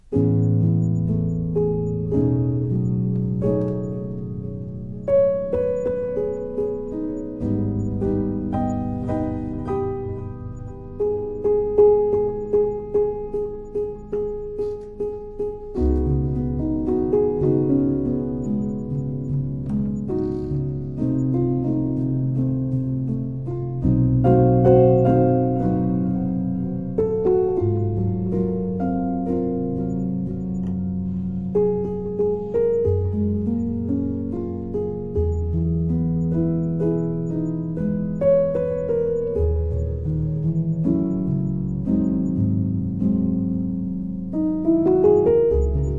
Late Jazz Piano with Pianist noise
Slow, Noise, Music, Piano, Recording, Night, Cinematic, Jazz, Ambient, Film, Felt, Sound, Late, Movie, Pianist, Sample, Bar